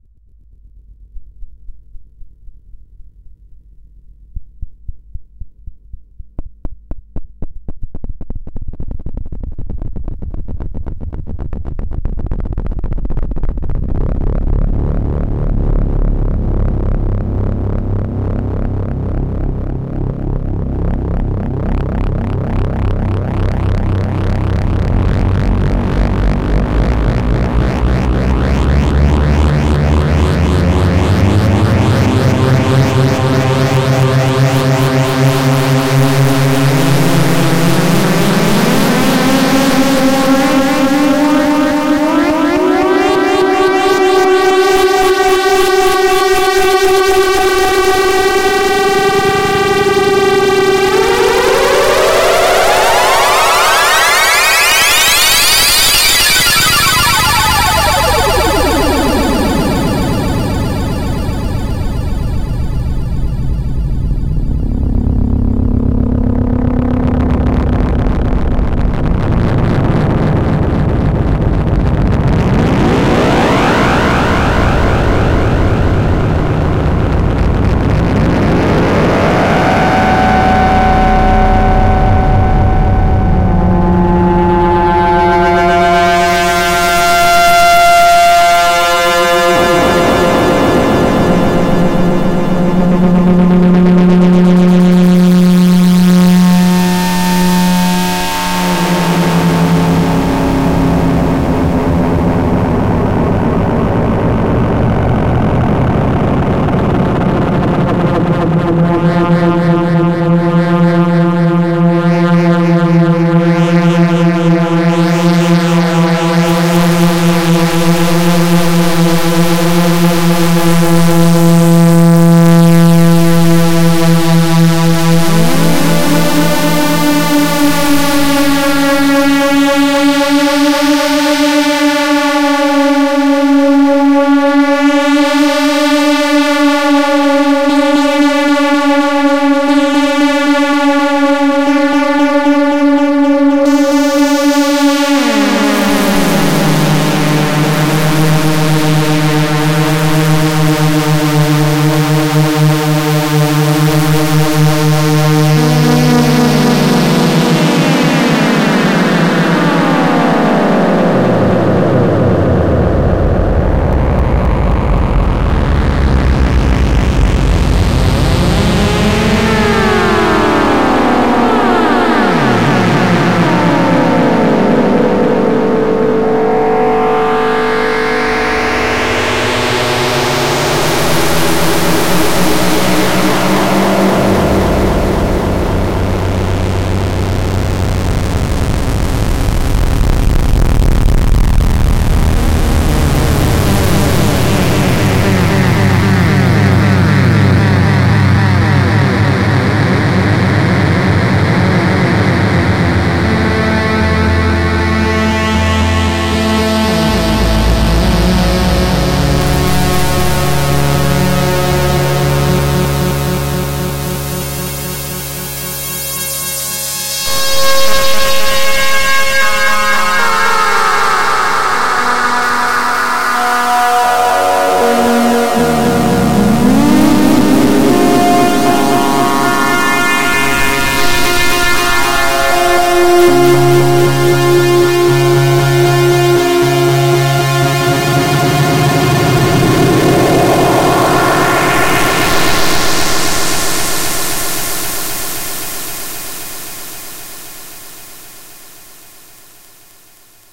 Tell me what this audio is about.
Ratatech Dark Noise tested with a VST delay. Just some tests playing with the VCO, LFO and filter
analog-synthesizer, delay, noise